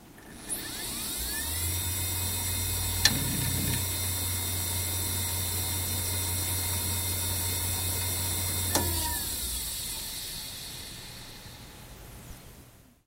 Samsung V40 - 5400rpm - BB
A Samsung hard drive manufactured in 2001 close up; spin up, and spin down.
(sv4002h)
rattle, machine, drive, hdd, disk, hard, motor